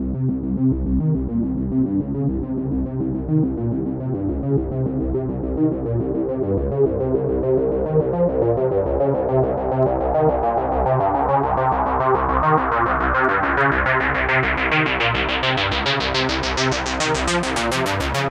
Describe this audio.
Phat bass line
Fade in effect on the bassline.
trance, sequence, beat, distortion, 105-bpm, bassline, pad, distorted, techno, bass, hard, strings, melody, synth, drumloop, progression, phase